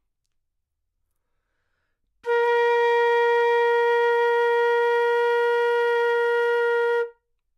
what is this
Part of the Good-sounds dataset of monophonic instrumental sounds.
instrument::flute
note::Asharp
octave::4
midi note::58
good-sounds-id::2996